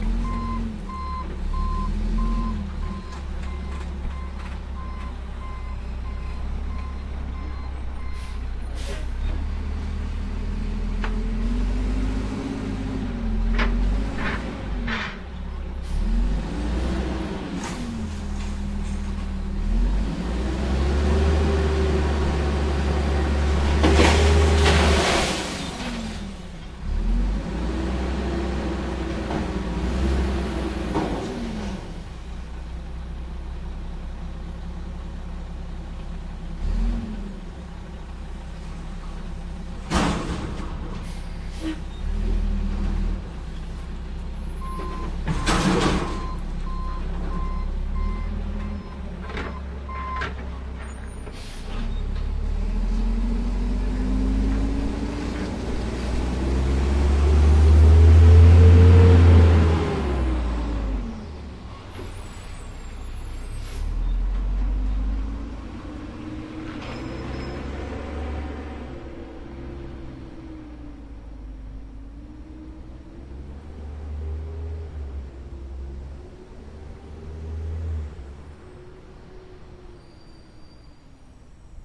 Sound of the garbage track that often comes by to pick up trash for a nearby business (at 2 AM). Captured from my porch across the street.